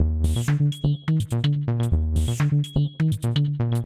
Groove FM8 Es 125BPM-01
bas, loop, 125bpm